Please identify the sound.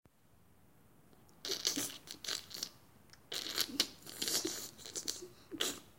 risa cigüeña
a, animal, cig, e, risa